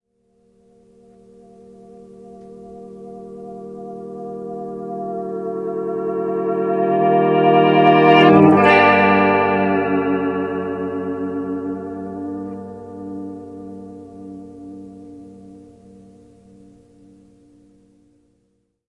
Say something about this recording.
GUITAR REVERSE/NORMAL
I recorded this on my Yamamha Pocketrak directly off my Simmons drum amp using my Epiphone SG with a vibrato pedal and a little whammy bar. One track duplication for each effect. Thanks. :-)
CHORD, GUITAR, REVERSED, SOUND